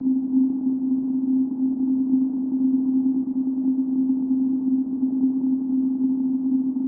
OSf - AtmoNoiseC4
Good day.
White noise + vocoder on C4 note
Support project using